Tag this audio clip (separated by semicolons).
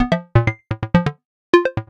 loops; synth